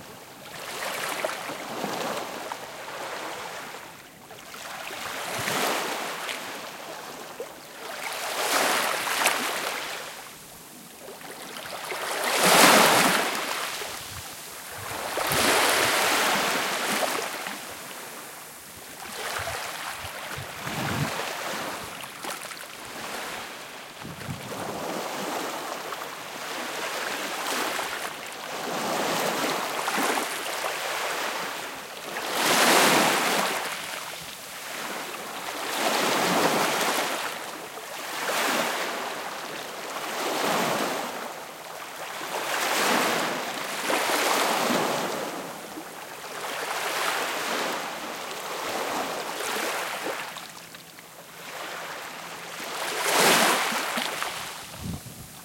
BEACH SMALL SAND
small sandy beach
atmosphere, beach, sand, sea, small